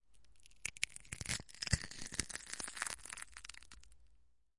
shell crunch - wide 02
Crushing an egg shell.
Recorded with a Tascam DR-40 in the A-B mic position.